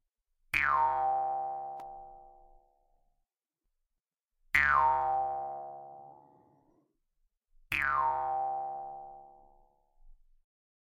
Mouth harp 2 - down
A mouth harp (often referred to as a "jew's harp") tuned to C#.
Recorded with a RØDE NT-2A.
foley, formant, formants, harp, instrument, jewsharp, mouth, Mouthharp, traditional, tune